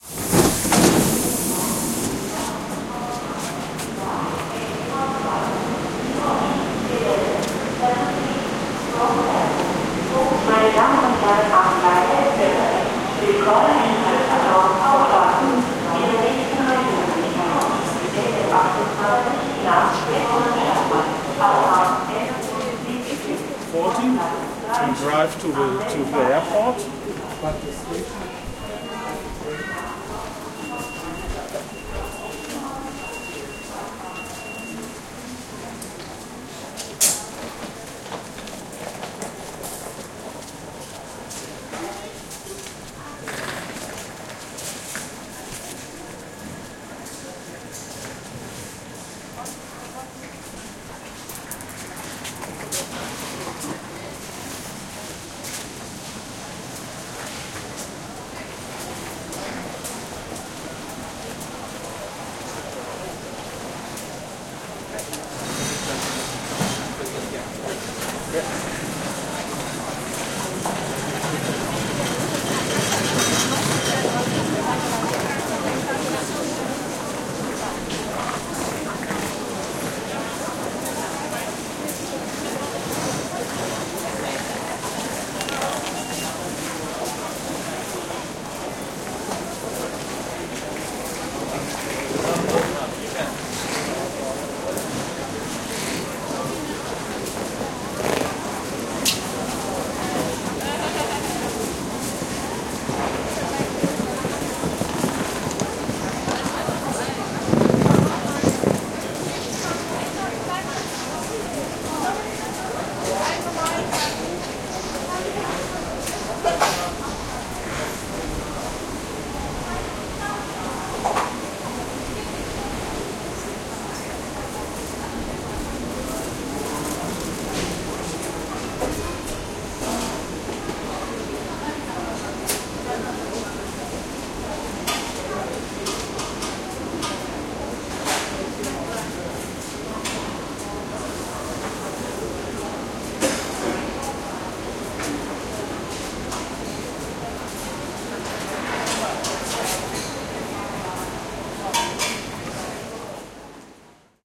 recorded with a olympus LS-11
arrival by train at the station düsseldorf. leaving the train and walking through the station